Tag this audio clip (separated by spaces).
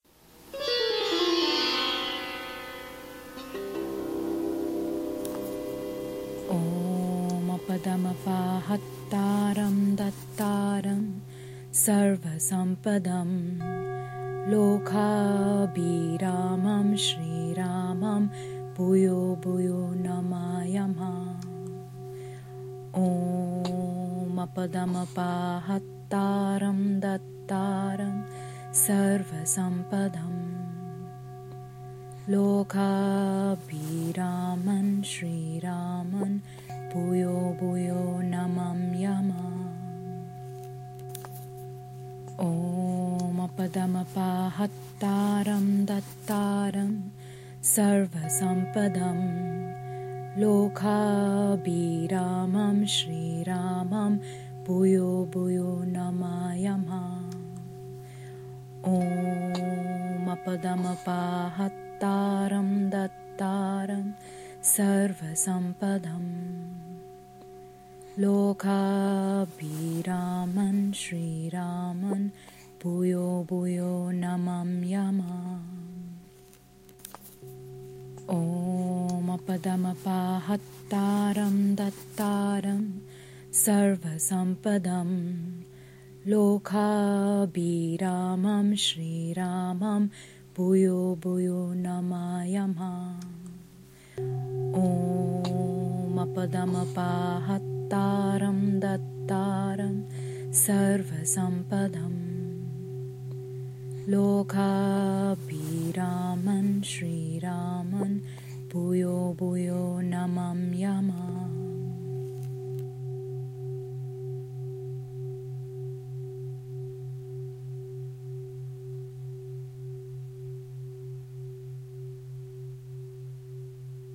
meditation Sanskrit relaxation healing Om mantra